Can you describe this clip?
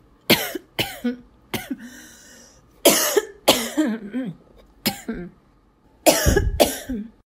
coughing on coffee
Right before recording a long, spooky exhale into my coffee cup, a little bit of coffee went down the wrong tube... but I'm okay :)
coughing, hack, hacking